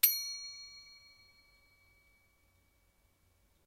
Small metal object resonating